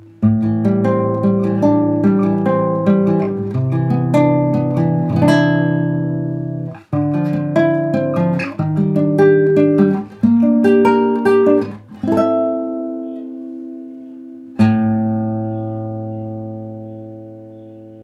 6 Chords by Classical Guitar
This is the sequence with 6 chords on classic acoustic nylon-strings guitar, playing by arpeggio, gently and quilty. Minor sentimental mood. Clean signal, without reverb or another different effect.
atmosphere, clean, strings, chord, arpeggio, classic, nylon, sentimental, guitar, classical, animato, quilty, Chords, minor, gently, acoustic